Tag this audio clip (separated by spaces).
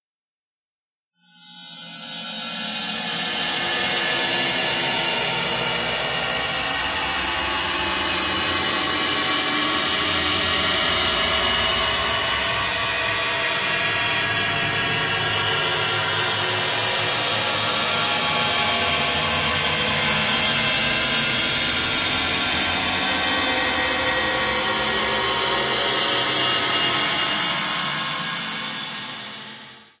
sci-fi screaming drone